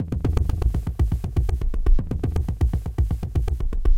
Raw Beat
A raw loop of a pretty heavy bassdrum with delay
beat, loop, percussion